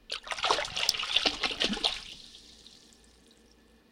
eel fishing 3
Splash made in a plastic utility sink filled with water. No reverb applied, sounds like it's in a small room. Originally recorded for use in a play.
drip, environmental-sounds-research, fishing, liquid, splash, water, wet